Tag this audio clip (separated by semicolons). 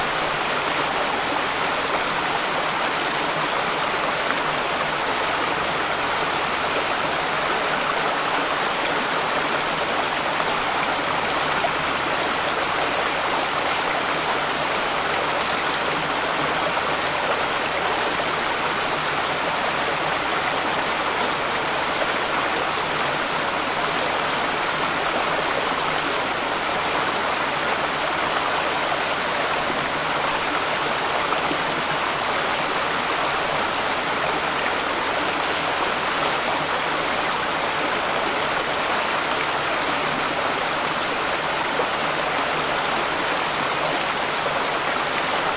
Sony; water; field-recording; wikiGong; built-in-mic; running-water; microphone; urban; splash; trickle; PCM-D50; ambient